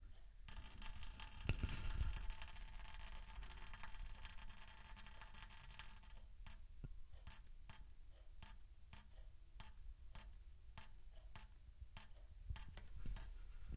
silent sound of some waterdrops falling in a metallic washbasin